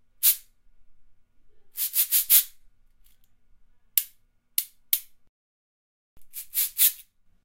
Afuche-Cabasa
Part of a pack of assorted world percussion sounds, for use in sampling or perhaps sound design punctuations for an animation
hits, percussion, world